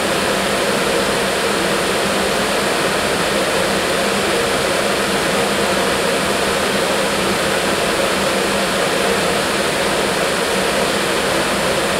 Fume extractor - Suomen puhallintehdas oy - Run end at the nozzle
Fume extractor running end cycle as heard close to the suction nozzle.
vacuum, tools, loop, metalwork, 4bar, machine, 80bpm, suction, field-recording, noise, fume